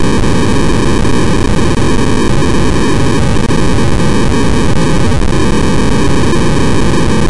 a friend sent me an audio file that wasn't supported by windows media player. when opened in audacity, this is what it came out to be (but it was WAY louder.)